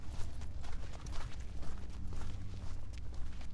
Walking on a gravel path in tennis shoes, in a loop
Sound Devices 722
Shure SM-57